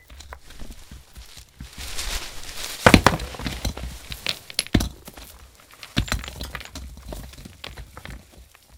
rocks fall with leaf rustle 3
Foley SFX produced by my me and the other members of my foley class for the jungle car chase segment of the fourth Indiana Jones film.
fall, leaf, rocks, rustle